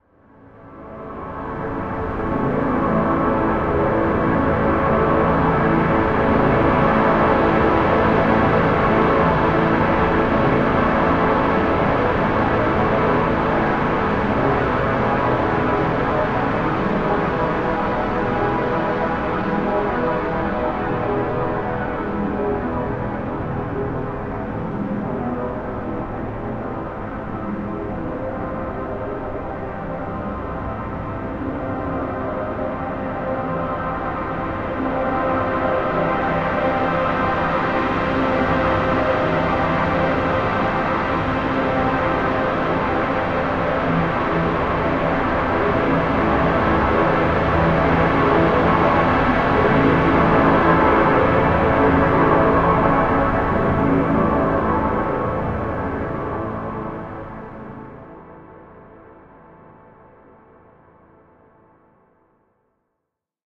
A soundscape I did in MetaSynth.